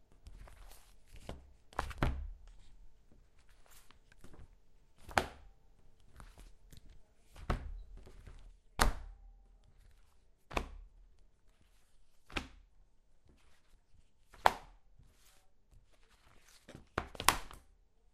Dropping a magazine on a wooden table a few times
drop, magazine, paper, table, wood